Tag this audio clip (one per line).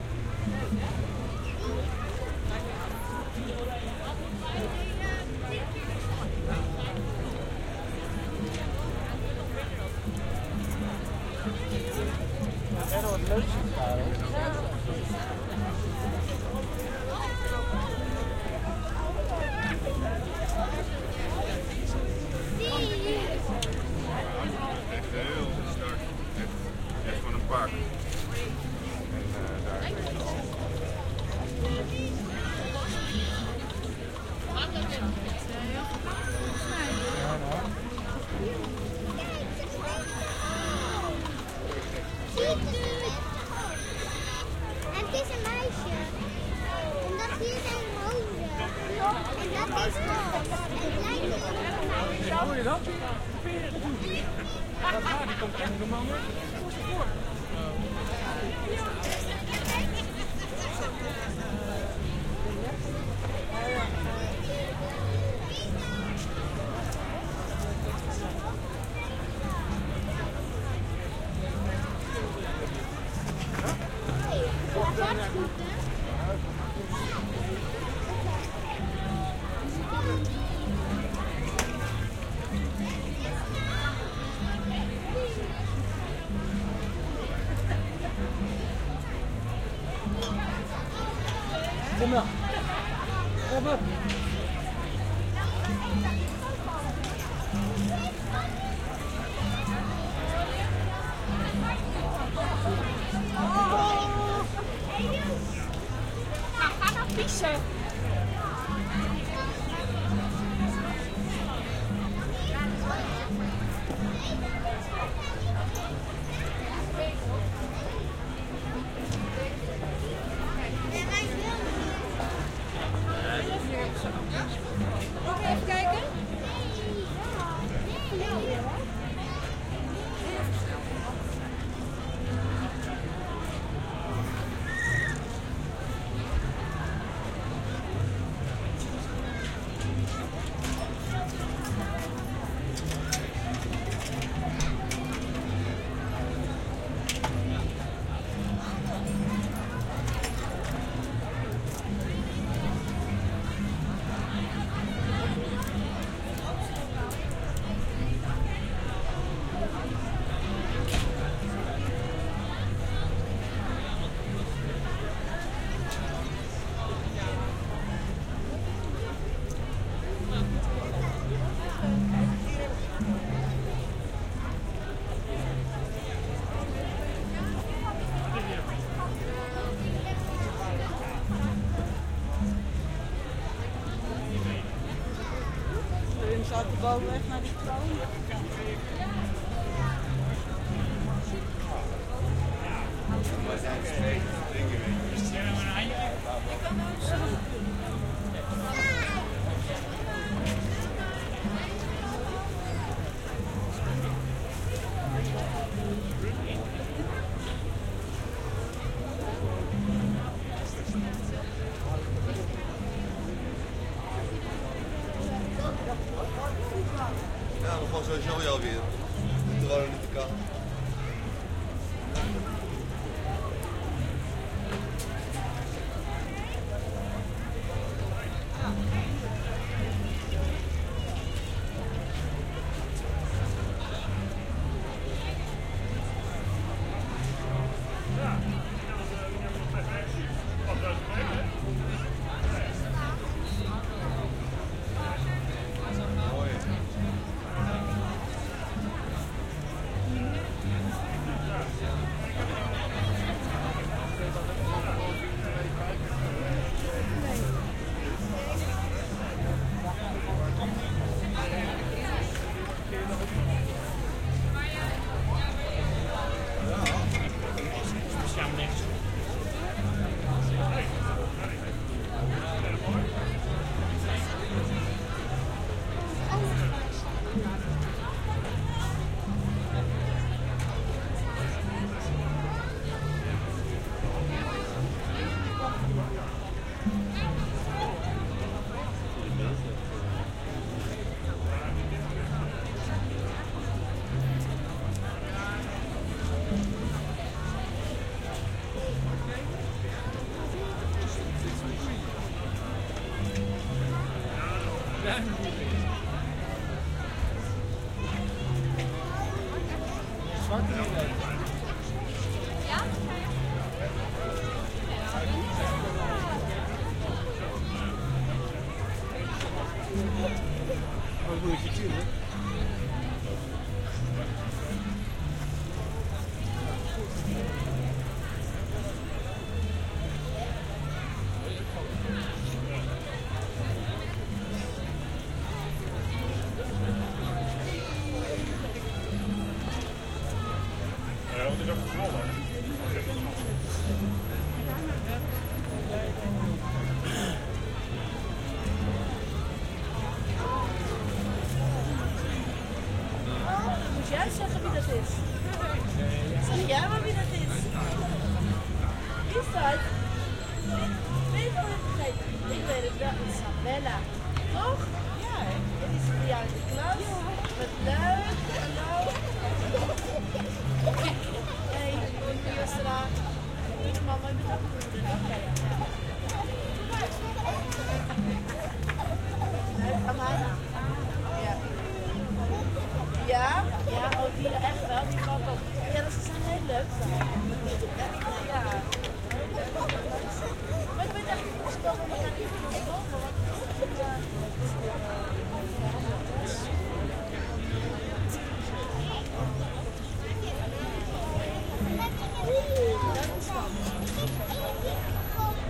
Amsterdam
binaural
binaurals
crowd
crowd-ambience
crowd-noise
Day
Dutch
feast
field-recording
holiday
king
kings-day
kingsday
Koningsdag
music
national-feast
noise
outdoors
queen
queensday
stereo
the-Netherlands
voices
Willem-Alexander